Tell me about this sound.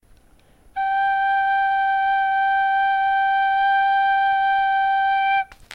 celia ramain02
La (A) au Pipeau Irlandais note tenue
Typologie:
N:continu tonique
Morphologie:
*Masse:son seul tonique
*Timbre harmonique: clair
*Allure:débit régulier,sans saccade
*Dynamique: attaque franche , son droit